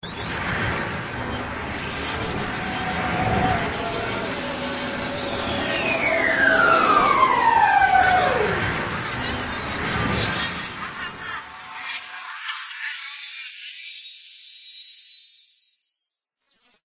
A Ghost's Musroom Trip
drug, scream, ghost, horror, trip, mushroom, scary